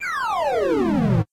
game noises 1
noise, weird